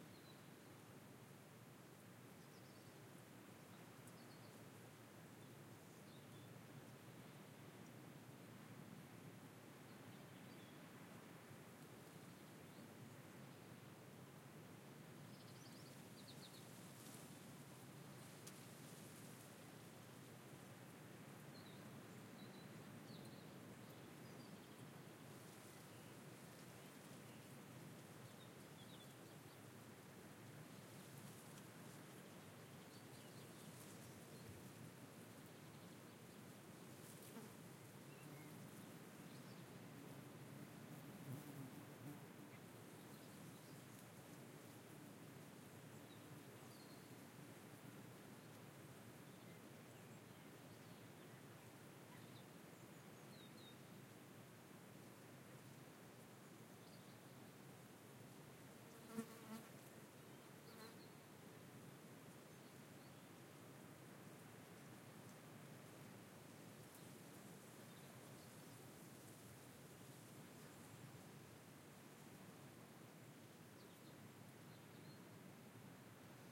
ambience near the sea and a first plane of a fly
h4n X/Y